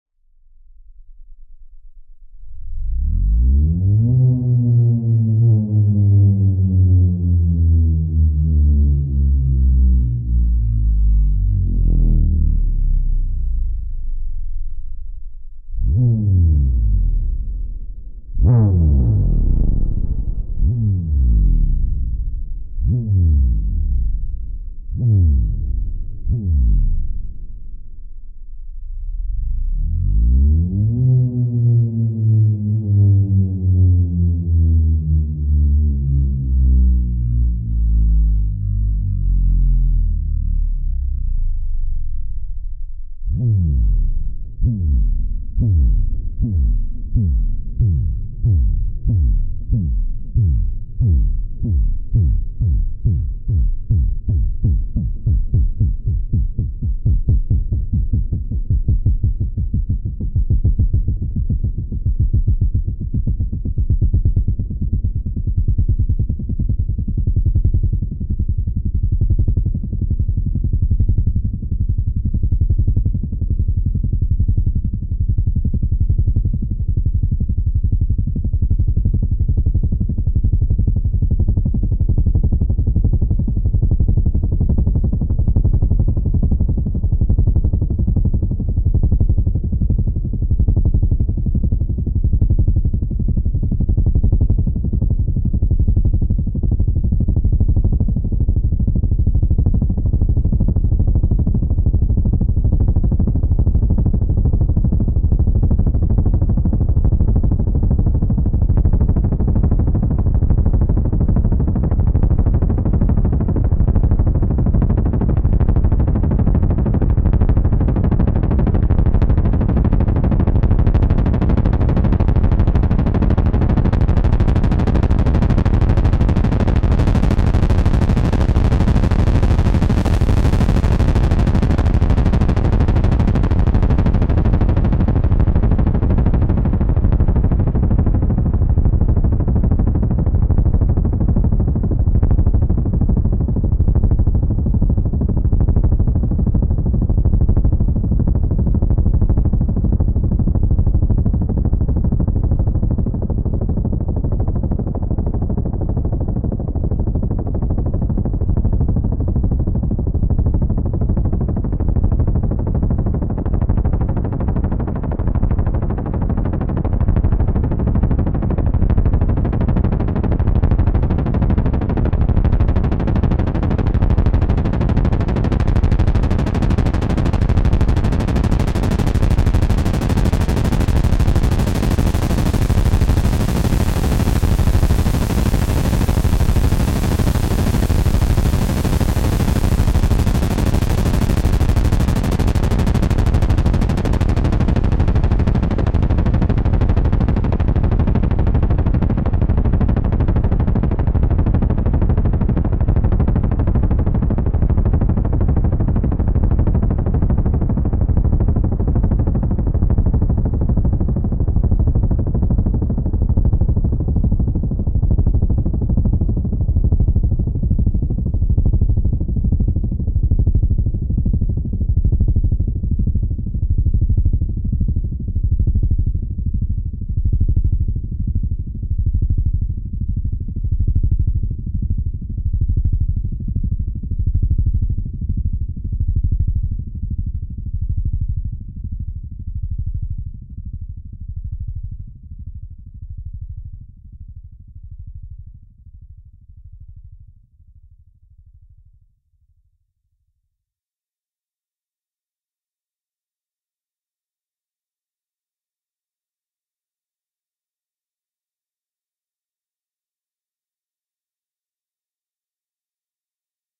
Helicopter drone sound i made on a my Behringer Model D analog synthesizer. Recorded trough my Soundcraft FX16II mixer. Effects come from external pedals (Zoom CDR 70, and Line6 echopark, from the lexicon process . Some processing was done later in Adobe Audition to finalize this sound.